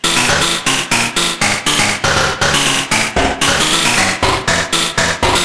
robot rhythm
robot sound machine recorded and processed with vst and keyboard
artificial, electromechanics, electronics, fantasy, industrial, machine, machinery, mechanical, robot, robotics, science-fiction, sci-fi